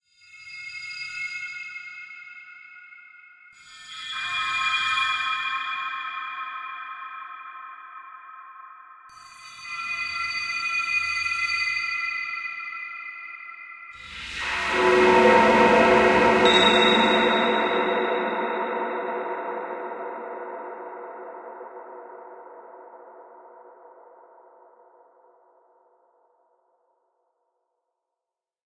Ghostly Transition 2
A ghostly transition created with modal sound synthesis.
Recorded with Sony Sound Forge 10.
ghost, evil, paranormal, devil, drama, sinister